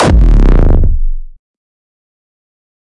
Hardstyke Kick 14
layered-kick, Rawstyle, Hardcore, bassdrum, Hardstyle-Kick, Hardcore-Kick, Rawstyle-Kick, Kick, distorted-kick, Hardstyle, distrotion